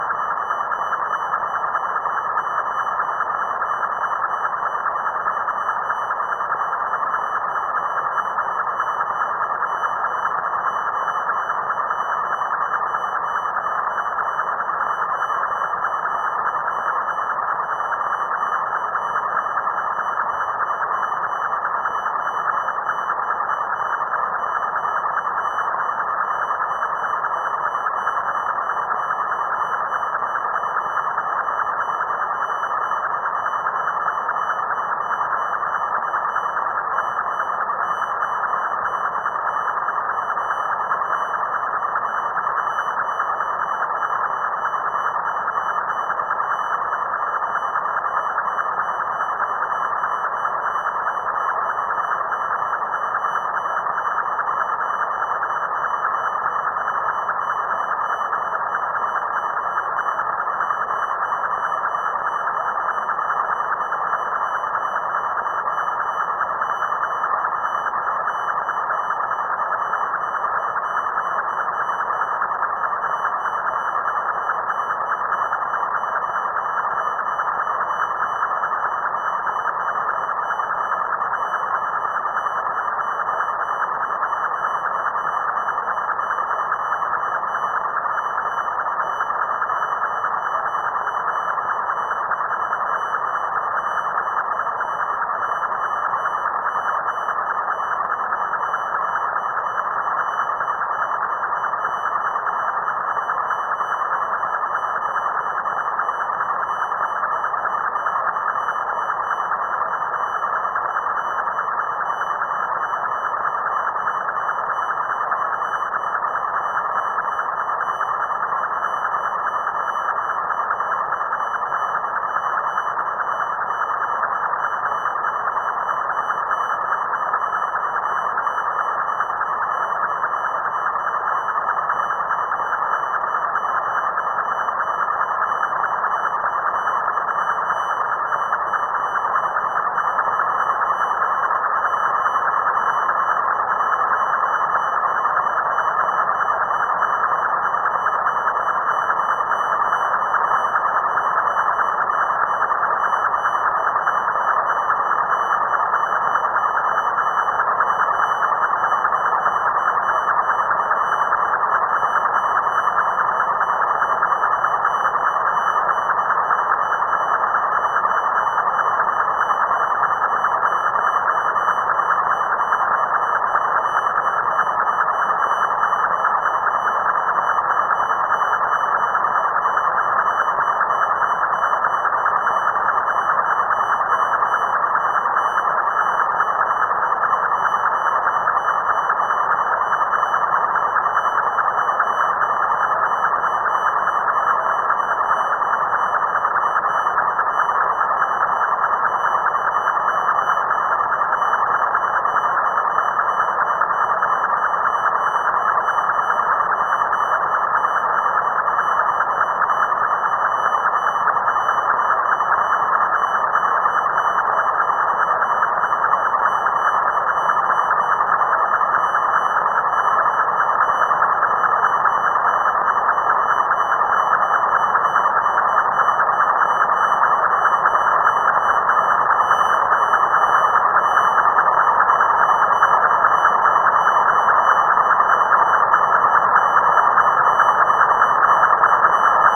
EMF ambience
recorded with coil mic